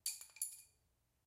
recorded on Zoom H4n Pro with a touch of editing
a pencil falling and hitting on different objects
-Julo-
dropping, fall, pencil, hit, hitting